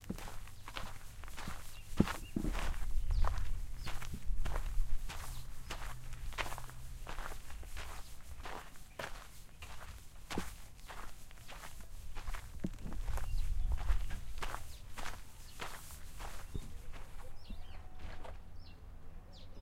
This was recorded with an H6 Zoom recorder at my home as I walk along a dirt path thinking it could be used for someone walking in nature like on a forest path.
crunching, dirt, footsteps, OWI, rocks, scraping, soil, step, stepping, stone, walk, walking